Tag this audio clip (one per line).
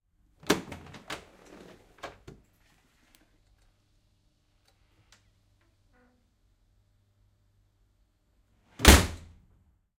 slam
doors
shut
open
closing
door
opening
close
train